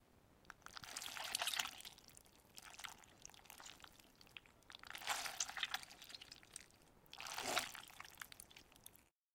Wet spaghetti being squahsed.